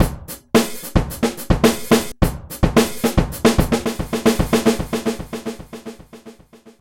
Added some Echo at the end and looped one cymbal
breakbeat, echo